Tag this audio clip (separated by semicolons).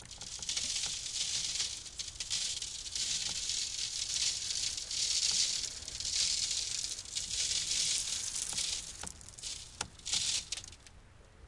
metal; pour; sand